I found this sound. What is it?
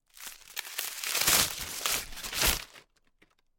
A crumpled up piece of paper being flattened back out again.
paper, wastepaper, scrunched, crumpled, ball